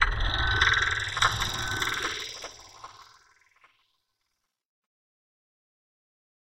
Tempo synced resonant mechanic impulse with a fast delay. Panned from the right to the left. Very processed.
impulse, mechanic, metal, sync